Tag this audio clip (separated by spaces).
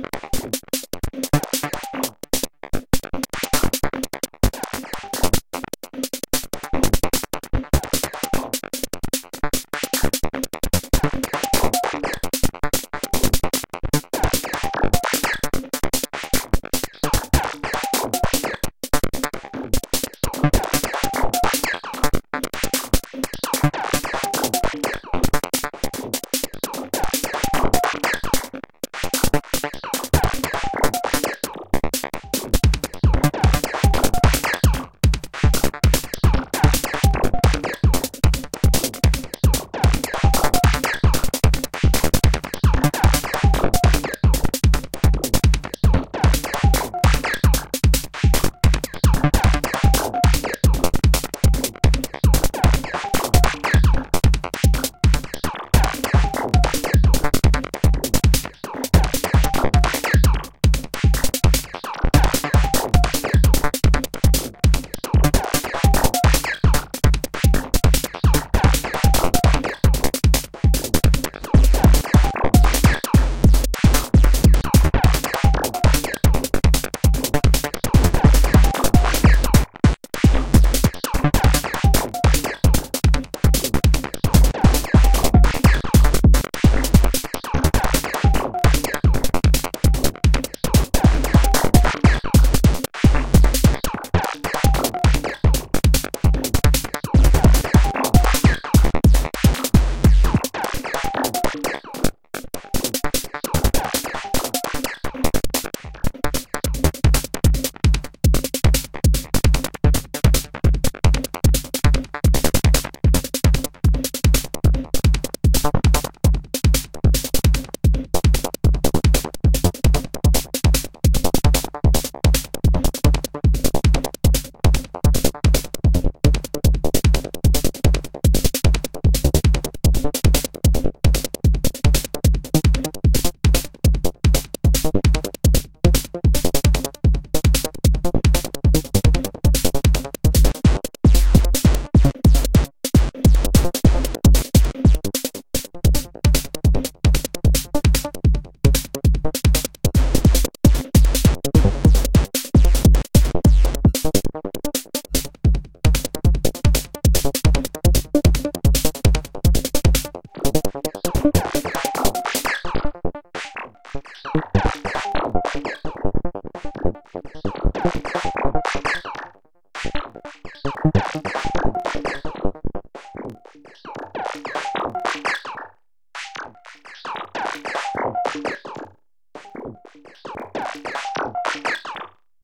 electronic bass modular synth kick beat digital techno snare synthesizer